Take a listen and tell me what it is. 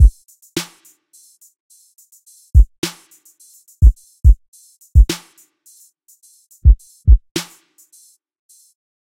Taken from a our On road Bruce project, made to go along with a slap base line. Mixed nicely